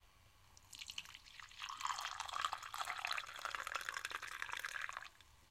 coffee in cup
filling a small cup with liquid (coffee), near and clear sound
liquid, coffee, kitchen, fill, cup